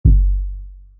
Kick coming from Yamaha PSR-215 going to EQ-10 -> Morley Pro Series II -> Boss MD-2 ending up at UR44.
BOSS, EQ-10, HIP-HOP, HOUSE, II, KICK, MD-2, MORLEY, MXR, PRO, PSR-215, SERIES, TECHNO, YAMAHA